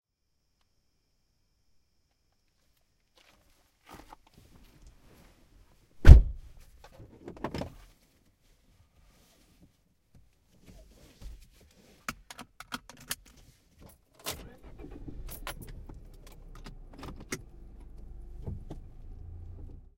LR FRONT INT CAR DOOR SHUT MVT cricket eve
This is entering a car and car start from the perspective of the interior of a car with the doors and windows shut. This was recorded with an H2 in 4 channel mode. This is the front pair. quiet evening ambience low level.